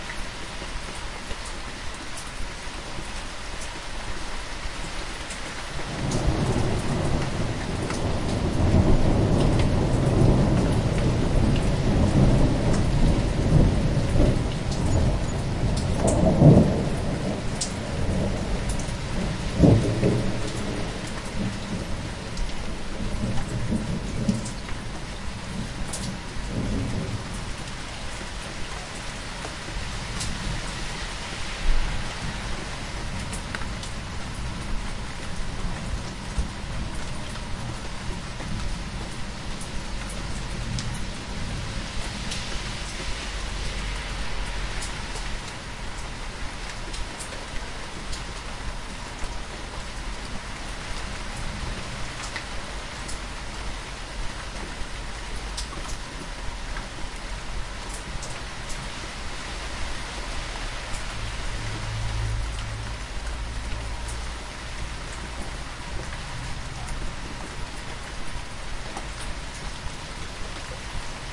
thunderstorm recorded in my back garden, late spring. Zoom H1.
rain, thunder, thunderstorm